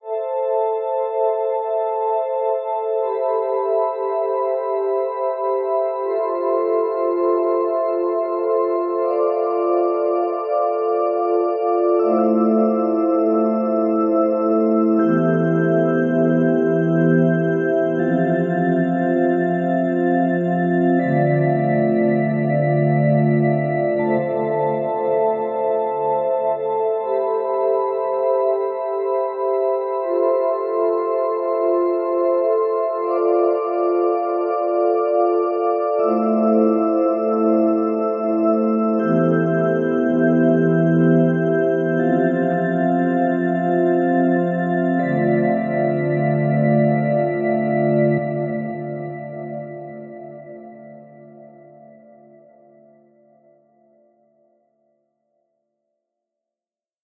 Calm Synthesizer, B
Another little play around with synthesizers. This one is a cliche progression that could be used as background ambience for a sorrowful scene. I will never have a use for it, so perhaps someone else will.
It loops once and I have left in the ending reverb so you can loop it yourself without any major reverb clipping. It is at roughly 80BPM and the progression is as follows (in case you wish to add more on top of it):
A minor (ACE)
C major 7 (CEGB)
F major 7 (FACE)
F major add 6 (FACD)
A minor (ACE)
E minor add 6 (EGBC)
F major 7 (FACE)
D minor 9 add 13 (DFACEB)
An example of how you might credit is by putting this in the description/credits:
Originally created on 2nd October 2016 using the "Massive" synthesizer and Cubase.